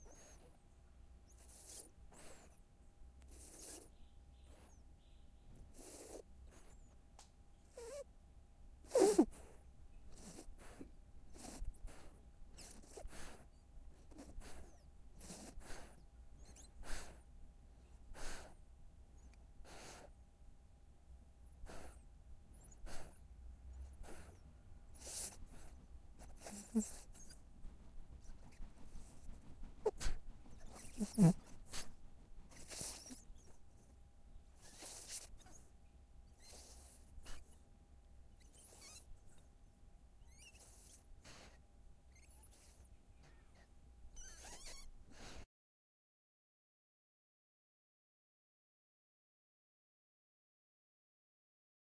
Puppy Sleeping
she slept so peacefully that i had to record it.
animal, breathing, dog, OWI, pet, sleep